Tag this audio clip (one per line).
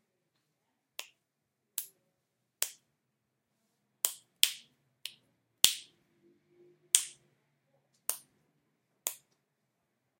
animation
clic
click
fingers